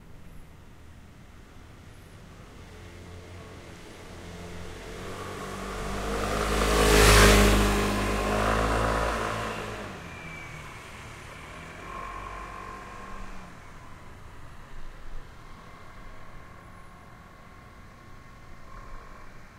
scooter pass-by speed up3
I'm continuing on with my random scooter drive bys. Hopefully someone will have use for them!
Wind Protection: None (Still awaiting my redhead!)
Position: about 1 1/2 feet off ground, side of road
Location: Koahsiung, Taiwan (Fongshan District)
Mod, scooter, AT825, Taiwan, Tascam, traffic, pass-by, DR-680, Busman, street